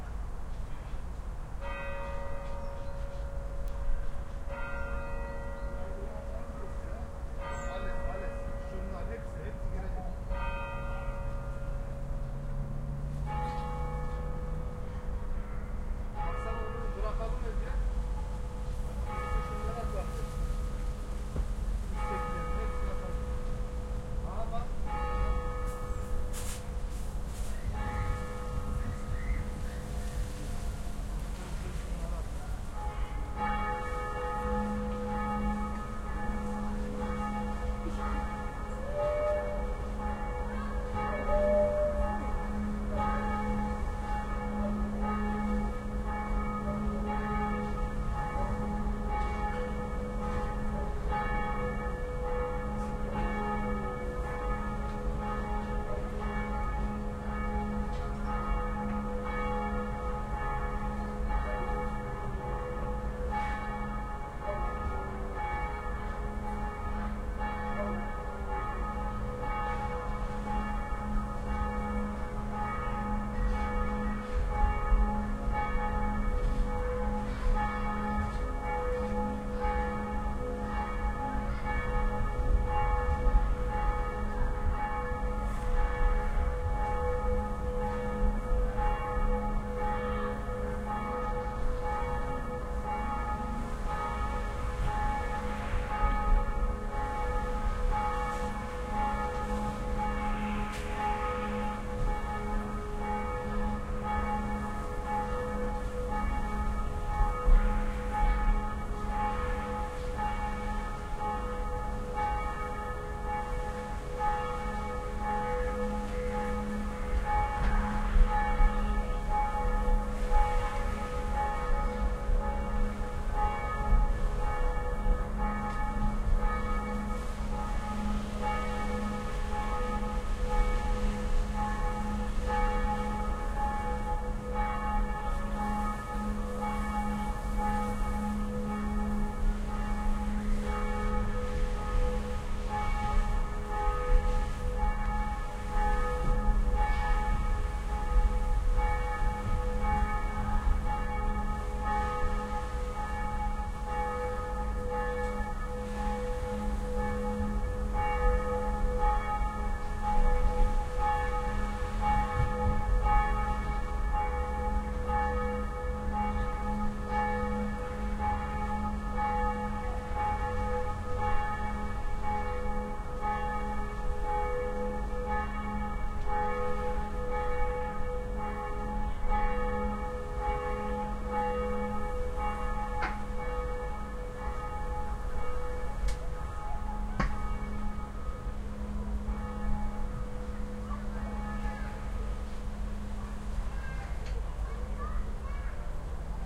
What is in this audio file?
Churchbells near a youthcentre. Not exciting, but still worth recording. Shure WL-183 microphones, FEL preamp into R-09HR recorder.
bells, church, church-bells, field-recording